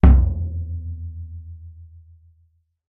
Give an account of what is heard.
Floor Tom Hit
drums, floor, percussion, Tom